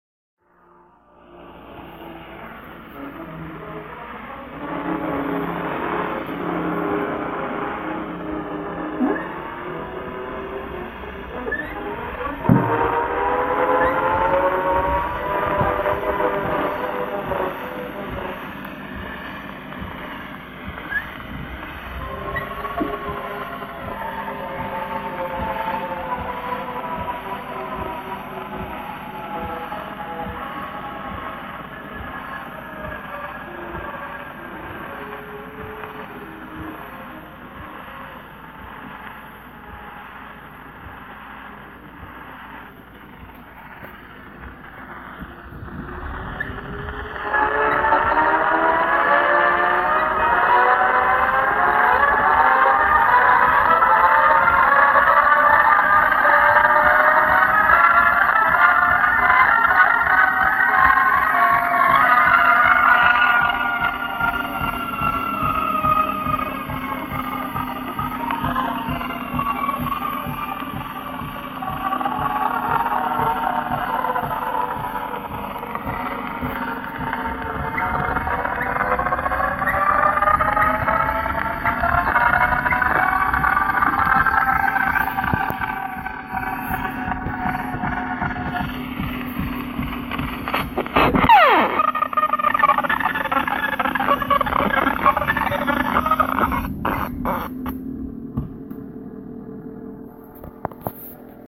1907 creepy phonograph music
It's an old 1907 phonograph playing classical music
creepy, eerie, old, phonograph, record, spooky